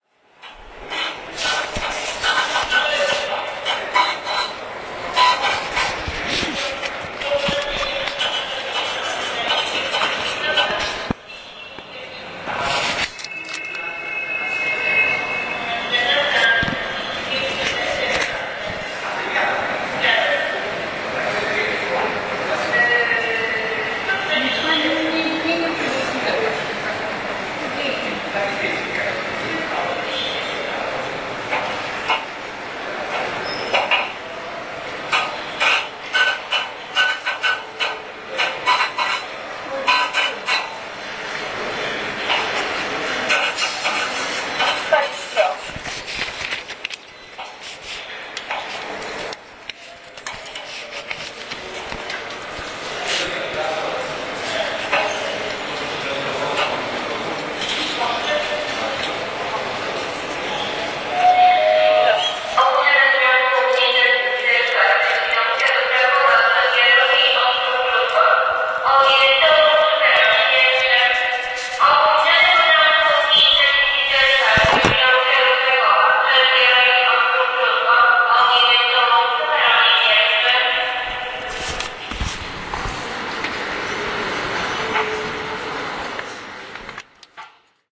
buying train ticket260610
26.06.2010: about 22.00. I am buying the train ticket to Strzalkowo village where I am going to conduct ethnographic-journalist research about cultural activity. The sound of printing the ticket, paying for the ticket, the announcements. In the background general typical ambience of the main hall of the central station in the city of Poznan.
more on:
ambience, announcement, buying-ticket, cash, echo, field-recording, hall, paying, people, poland, poznan, printer, ticket-office, train-station